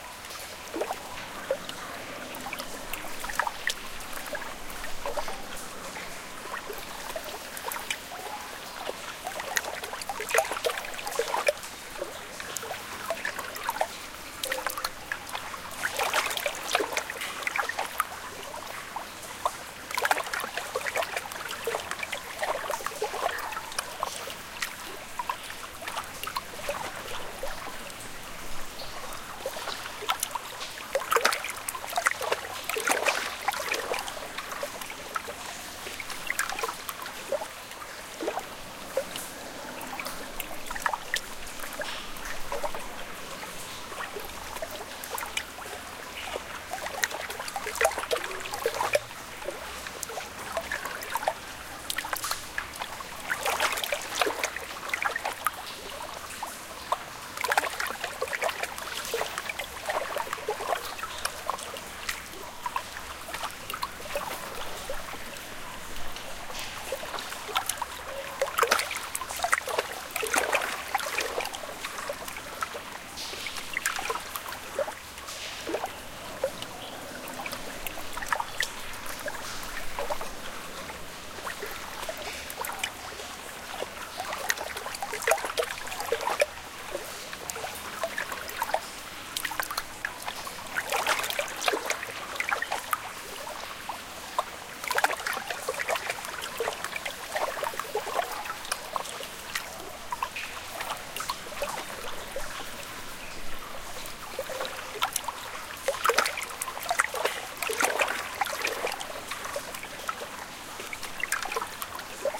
I'm working on a new sleep video and this is a mix I created in audacity of an underground lake.